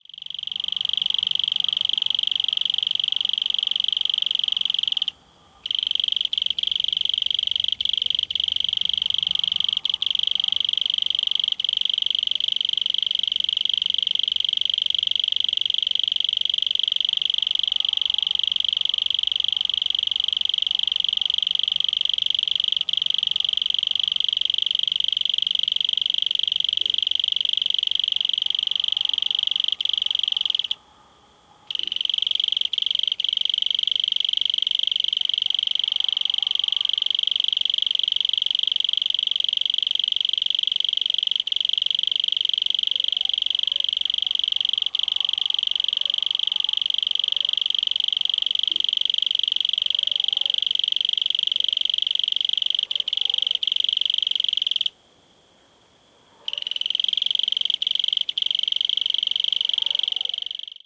Strong cricket call in foreground, dogs barking in the distance. Sennheiser MKH60 + MKH30 into Shure FP24 preamp, Edirol R09 recorder
cricket, south-spain, nature, spring, field-recording, night, insects